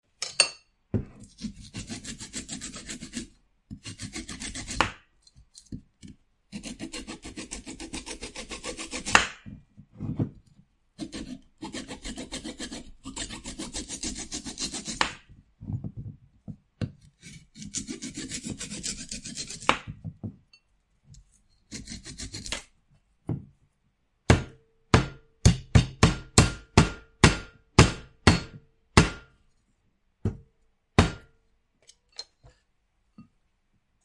Cutting coconut or something hard. Recorded with a Blue Yeti.
coconut, kitchen